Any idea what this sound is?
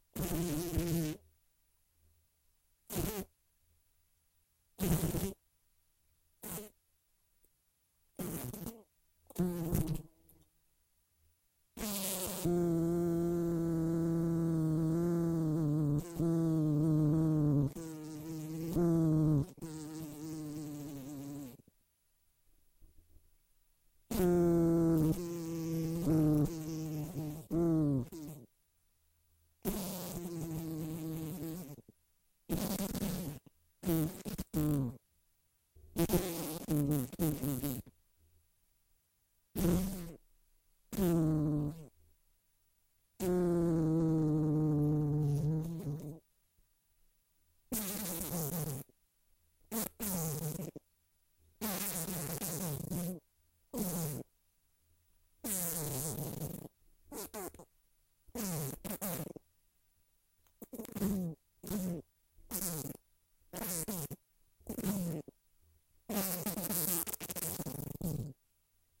House Fly
A fly happened to be in my office, so we had a little recording session.
bug-sound, buzz, buzzing, fly, house-fly, insect